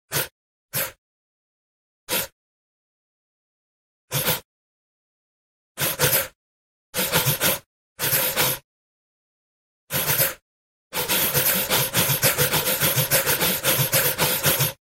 Multiple gasping breath sounds in a rhythmic pattern